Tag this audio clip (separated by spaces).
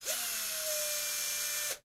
drill machine motor